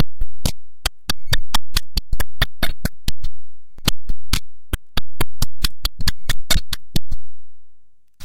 banging light bulb against the mike
Banging a broken light bulb against my microphone.
hit, broken, light, microphone, bulb, bang, loud